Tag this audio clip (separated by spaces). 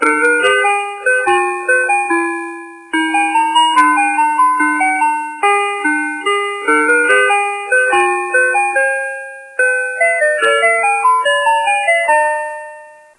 Cream Ice Truck